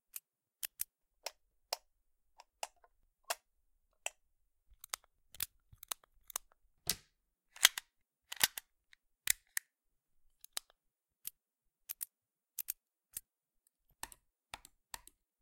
collection of various clicks from different sources such as computer mouse or a gun mechanism